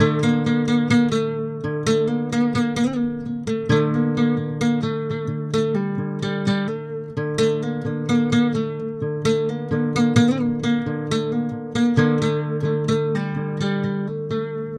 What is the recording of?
Eastern Guitar Nylon String 01 - 130bpm - D - New Nation
world, hip-hop, nylon, strum, real, dark, guitar, loop, trap, arabic, live, acoustic, nylon-string, music, string, eastern, pluck